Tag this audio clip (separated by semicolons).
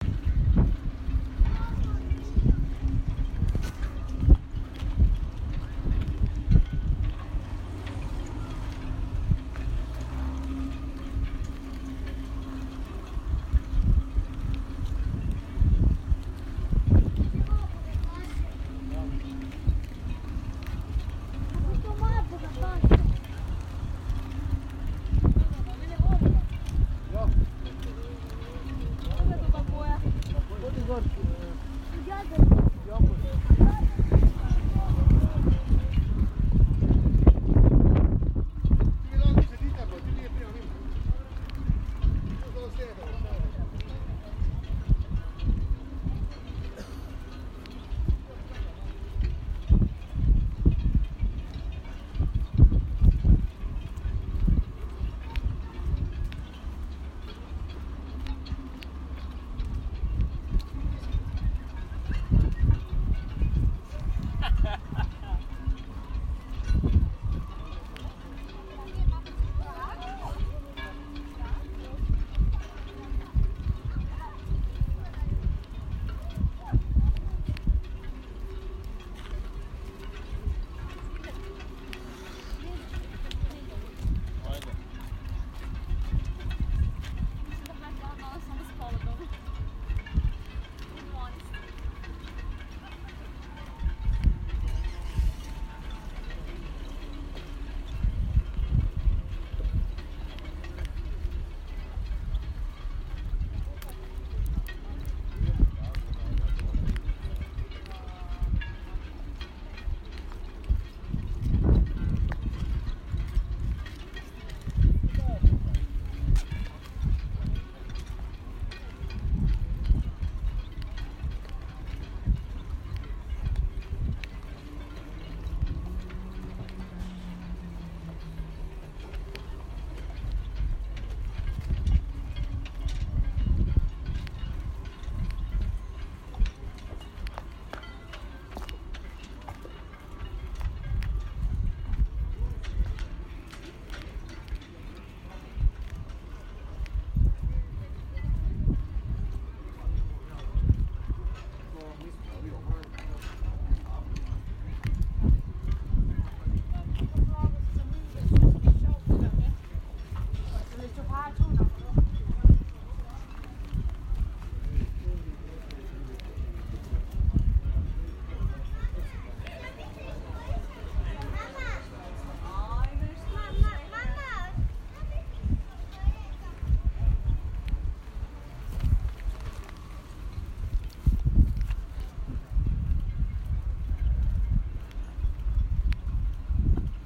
breeze; voices; ambient; soundscape; field-recording; ambience; wind; yacht